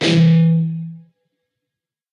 Dist Chr Emin rock pm
A (5th) string 7th fret, D (4th) string 5th fret. Down strum. Palm muted.
distorted, guitar-chords, rhythm-guitar, distortion, rhythm, chords, distorted-guitar, guitar